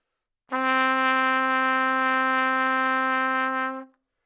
Part of the Good-sounds dataset of monophonic instrumental sounds.
instrument::trumpet
note::C
octave::4
midi note::48
good-sounds-id::2829